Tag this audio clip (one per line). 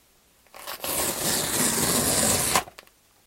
Board
Card
Cardboard
Long
Open
Rip
Ripping
Tear
Tearing
Thin